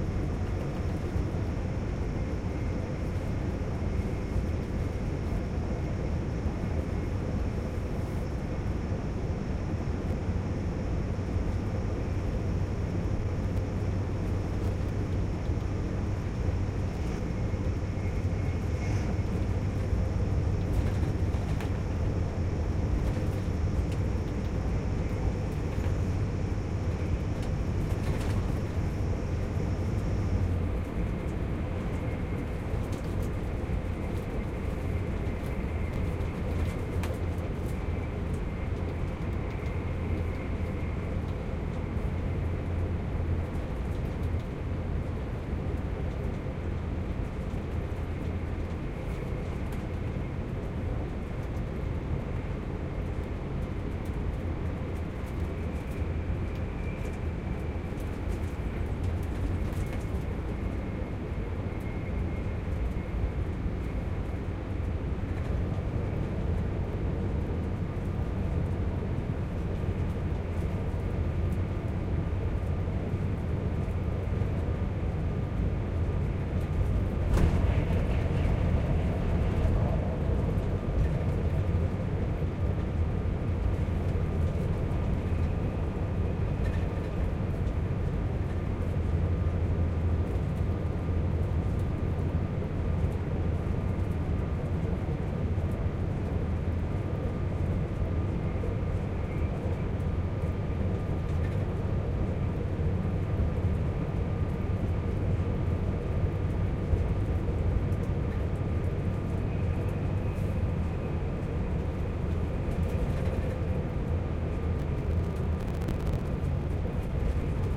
Inside an empty carriage E of Grand Central Train Service from Kings Cross to Mirfield. Some pips and distortion areas removed using adobe sound booth. Recorded on a Zoom i6 with an iPhone 5
Central-Grand, Carriage, Train